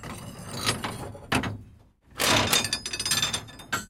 Metal heavy mechanics
Useful for heavy (ancient) metal mechanics.
A one-taker.